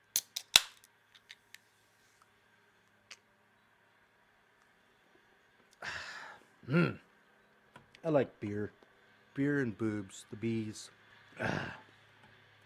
Opening can, drinking, and Male saying "I like Beer"
My buddy opens up a can, takes a drink or two, goes "ah" followed by "mm" and then says, "I like beer. Beer and boobs - the B's...Ah!" There is one other "B" he loves, but we shouldn't mention it here.
opening, refreshed, beer-can, tin, can, drink, bottle, beer, soda, s, drinking, male, coke, human, beverage, ah, mm, man, gulp, boobs, Mmm, B, voice, open, I-like-beer, aluminum-can